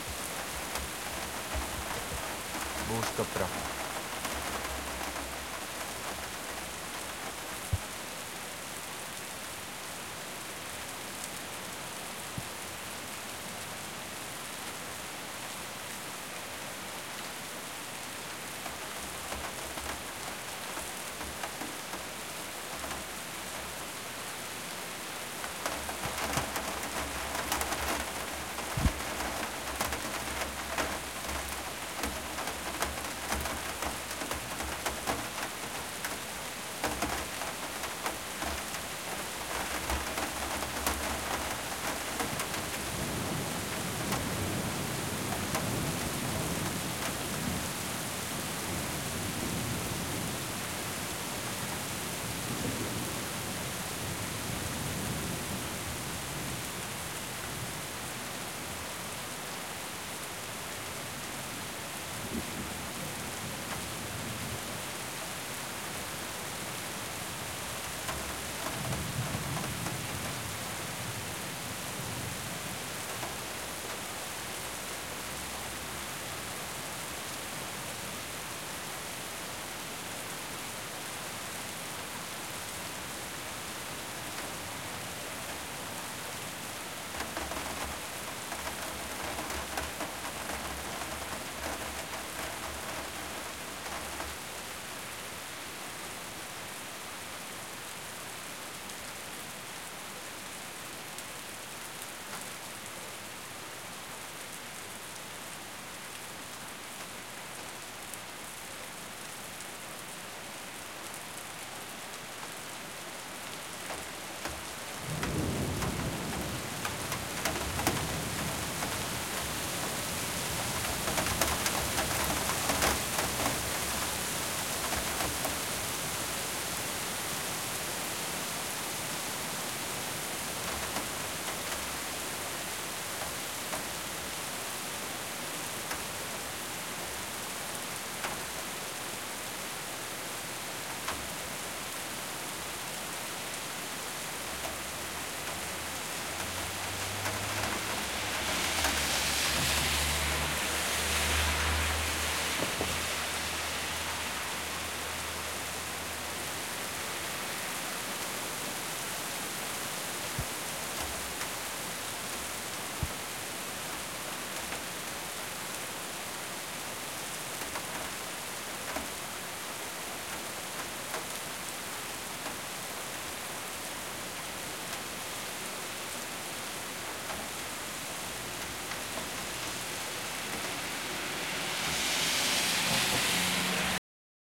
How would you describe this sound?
storm, rain on windowsill, thunder